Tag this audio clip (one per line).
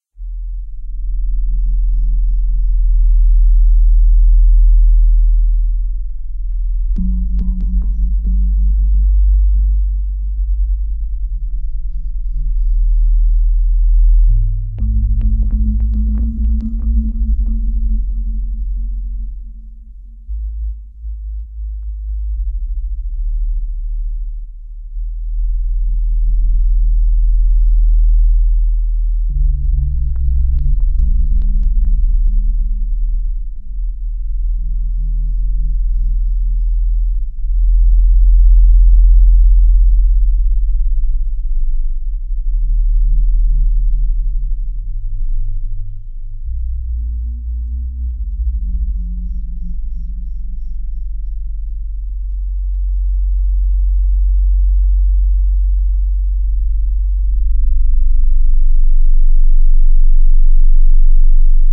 bassline
cavern
deep
odds